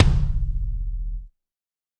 Kick Of God Wet 018
drum, god, kick, pack, realistic, set